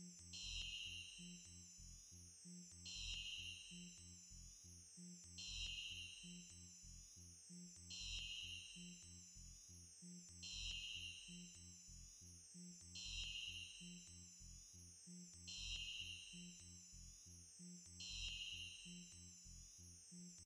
Alarm sound 8
A futuristic alarm sound
Space, Whistles, Alarms, Bells, Electronic, Sci-Fi, Noise, Futuristic